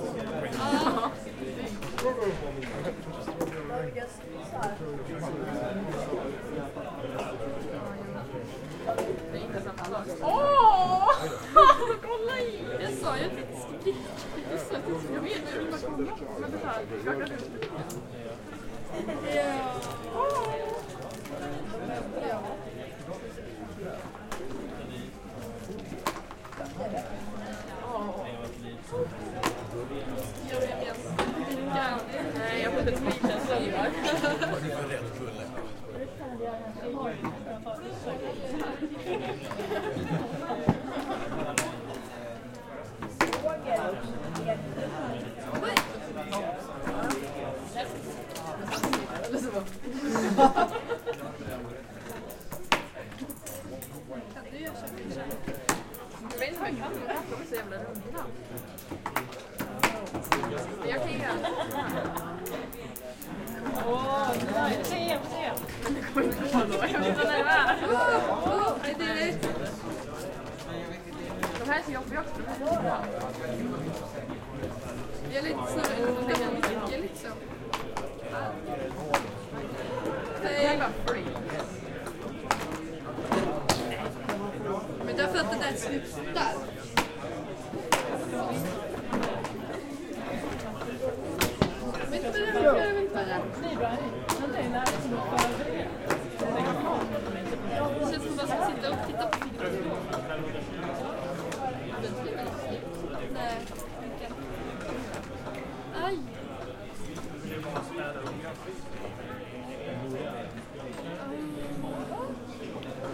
Ambience diningroom
An ambiance from a dining room at my highschool.
diningroom, people, ambience